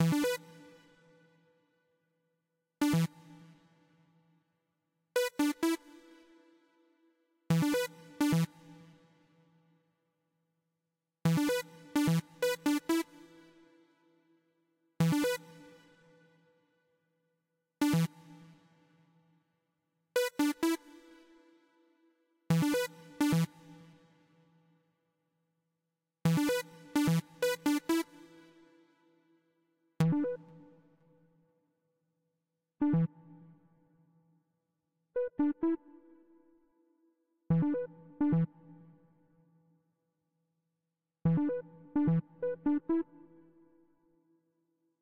Arp variaton menu
3 variation of this sound, cutoff: 100%, 50%, 0%.
Please, do not forget to indicate me. It's can be HELPFUL for me. Enjoy :)